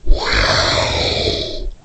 dragon roar distressed 8
Dragon sound created for a production of Shrek. Recorded and distorted the voice of the actress playing the dragon using Audacity.
creature, distressed, monster, roar, vocalization